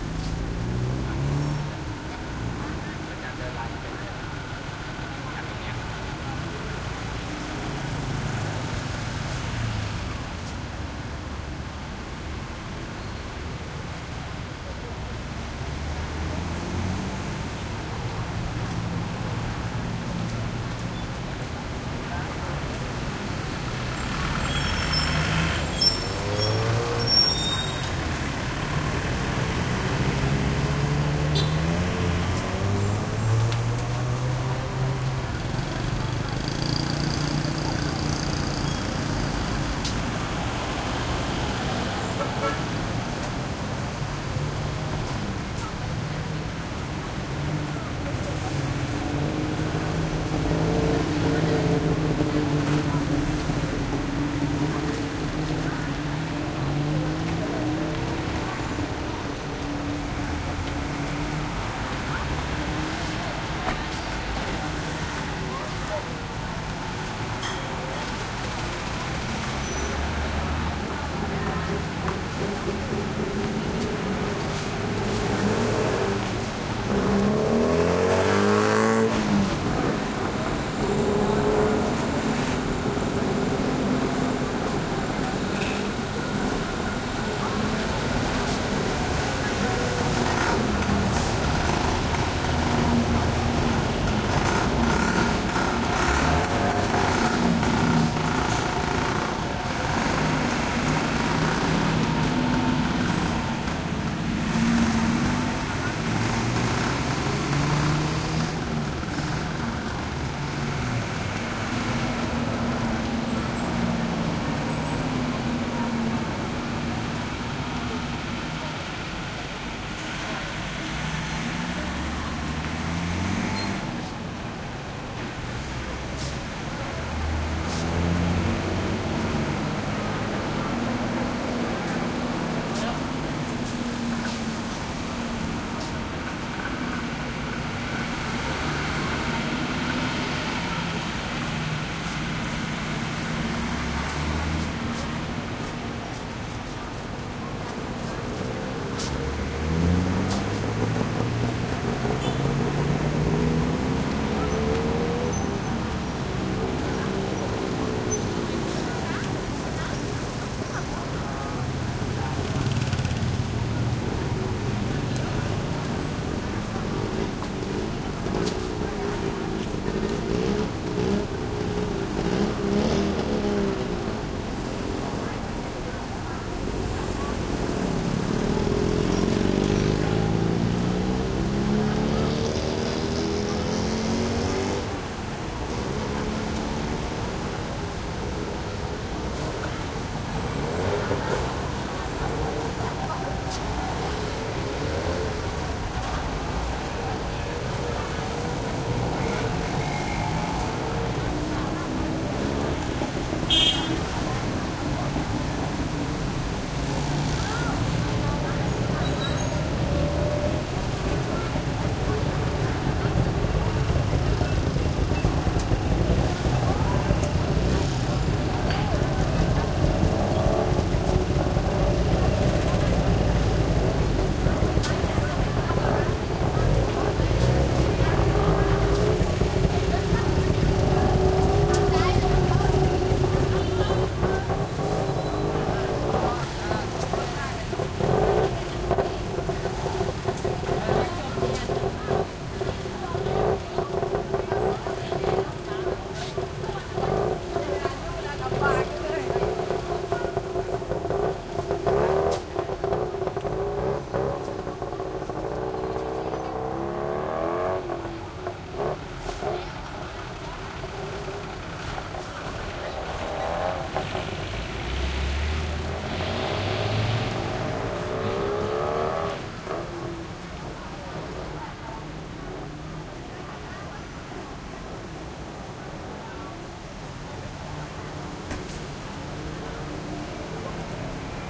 Thailand Bangkok traffic heavy busy intersection motorcycles trucks pedestrians1
Thailand Bangkok traffic heavy busy intersection motorcycles trucks pedestrians
heavy, Bangkok, trucks, traffic, motorcycles, field-recording, Thailand, busy, intersection, pedestrians